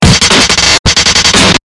Pump 5 Extreme GLitchj
deathcore, e, fuzzy, glitchbreak, h, k, l, love, o, pink, processed, t, y